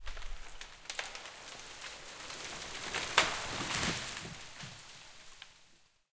timber tree falling 2
sound of tree falling
falling, timber, tree